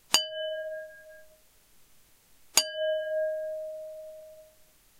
bowl resonance
This is the first sound in a new pack called "In Reality". This new pack was made to showcase the sounds made for stuff like Music Loops before they are distorted. This one is the original recording (with most static removed) of ceramic_crash. I simply hit a small ceramic bowl with a teaspoon 2 times.
bowl, ceramic, percussion, real, resonance